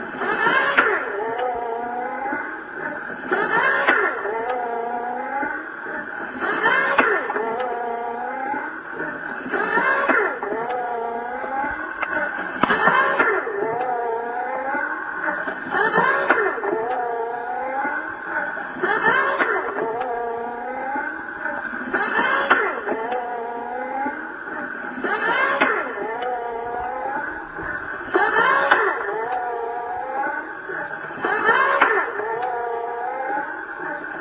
RAH electric crank wind and reverse multiple
Sound made by a small electric motor cranking and reversing a lever (on an infant's glider/sleeper). This take has multiple repeats of the sound (a different take I have here provides just one repeat of the sound). If you pitch-shift this down about six steps and add a metal/plate/hall reverberation effect, it sounds remarkably like a sound you hear in the carbonite chamber scene in THE EMPIRE STRIKES BACK :)
This was recorded with a Sony ICD-ST voice recorder held next to the glider, then noise removed with an audio tool, then normalized.
I request but don't demand credit to me (Richard Alexander Hall) for any use of this sound.
electronic, mechanical, sci-fi